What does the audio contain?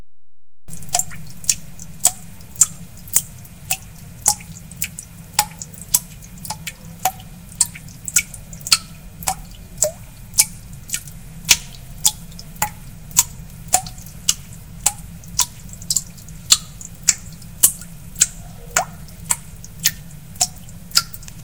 That's enough for me.
Have fun.